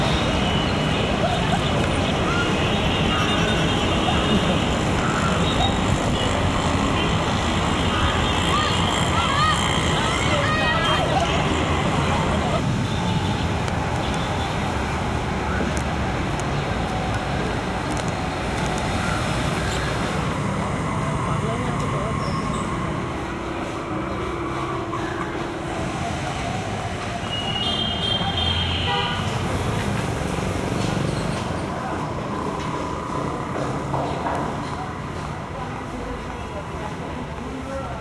India Streets Bangalore City 2 (Traffic, Pedestrians, Voices)
India, Streets of Bangalore City. You hear the usual Indian traffic with buses, cars, tuktuks, pedestrians and some voices.
Bangalore, Cars, City, Engine, India, indistinguishable-voices, Passing, Pedestrians, Public, Road, Streets, Traffic, Transportation